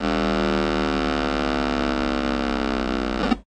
electro fart
Electro drone fart
fart, alien, space, retro